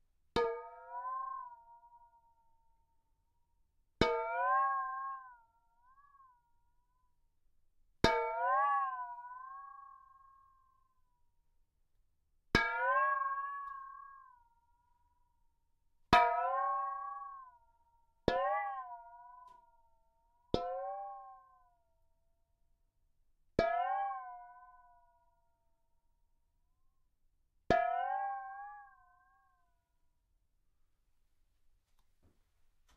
a series of wok hits, lowered into water. Gives an acoustic sounding dizziness and frequency shift. Could be good for comic style animation or theatre
comedy, dizzy, frequency-shift